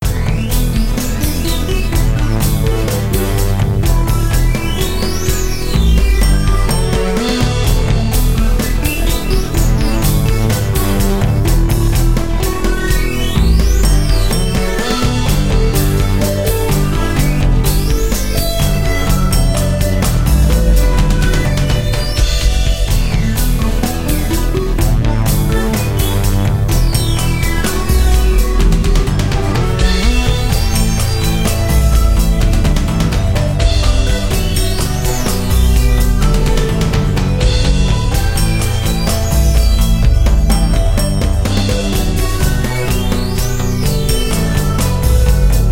Original Music loop at 126 BPM Key of E minor.